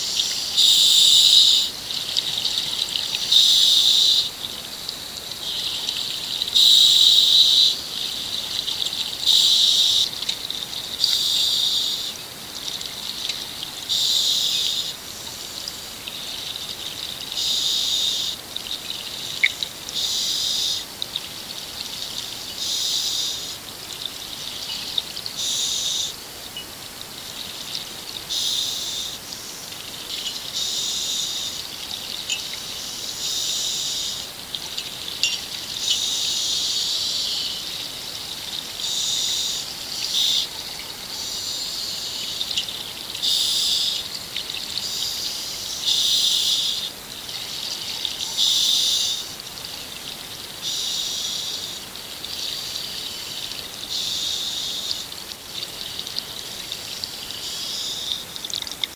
Scuba Diver Worker
Submarine workers breathing and fumbling around.
breath, breathing, liquid, sea, sink, sinking, subsea, swim, swimming, uboot, underwater, water, worker